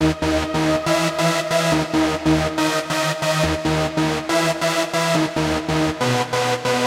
Cosmic Clip
dubstep, bigb, cosmic